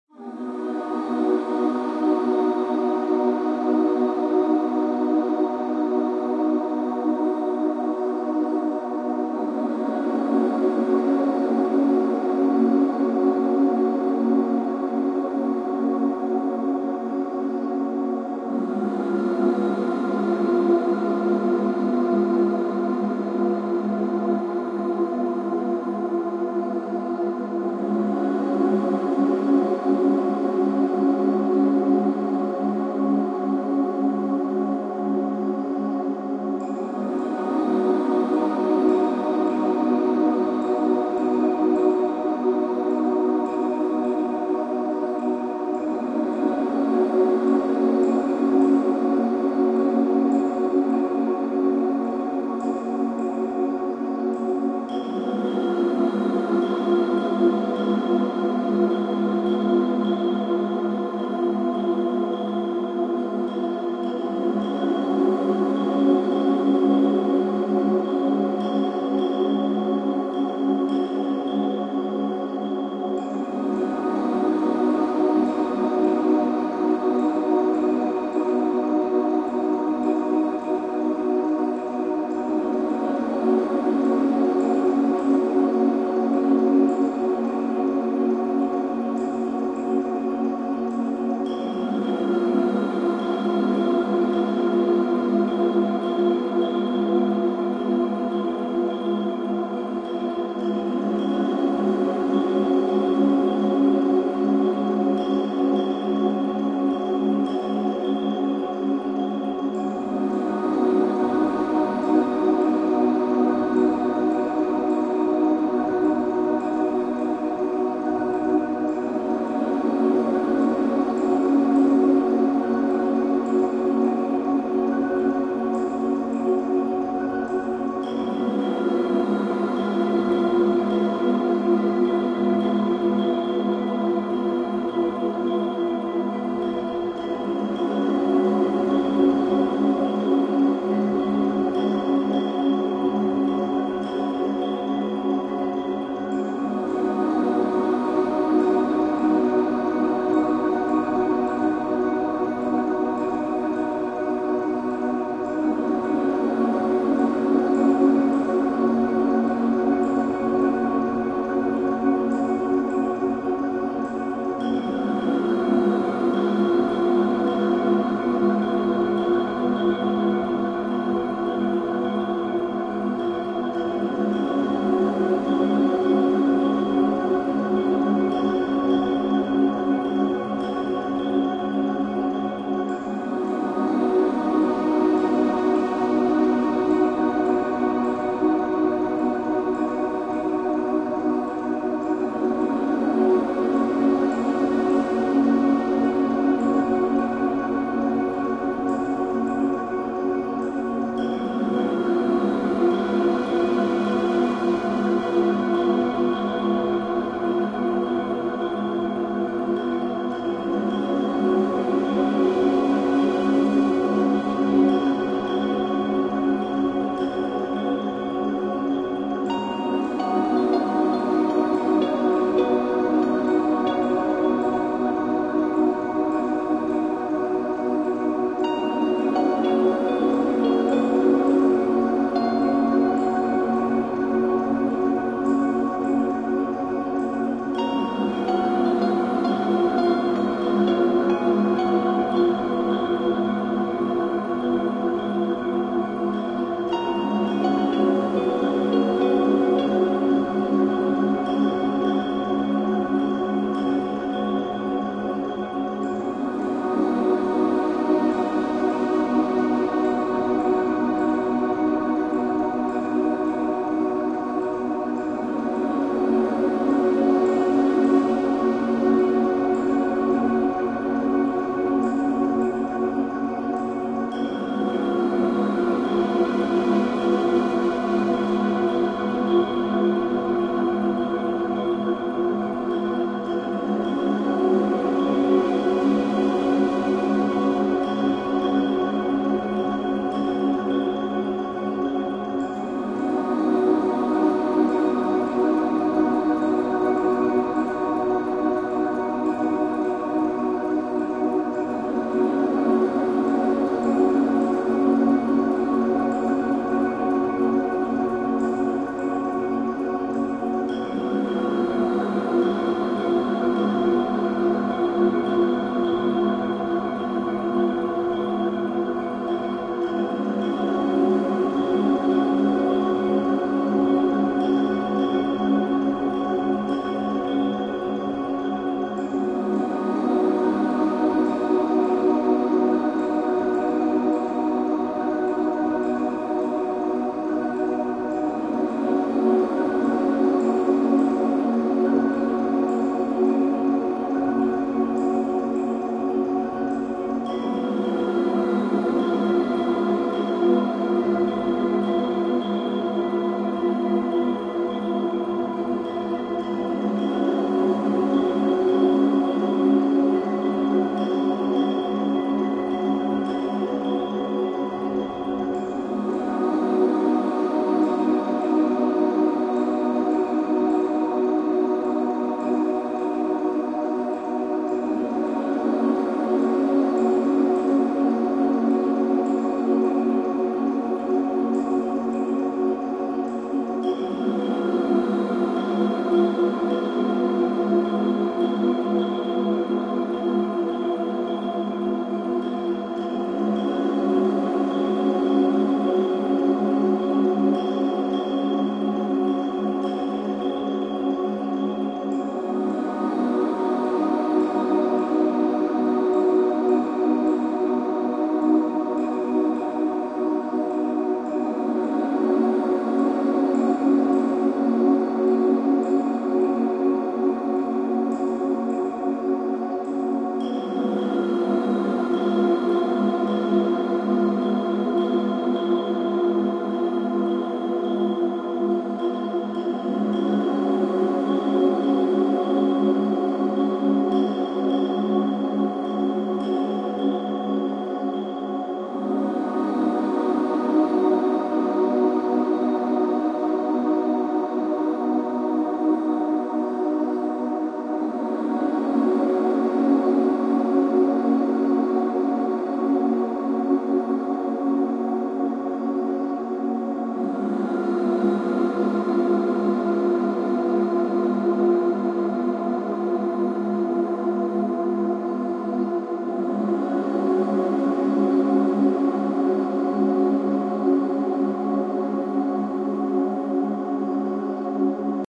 Choir Background Music
Genre: Choir
Trying the Jon Meyer Choir Library.
music, guitar, synth, relax, meditation, choir, background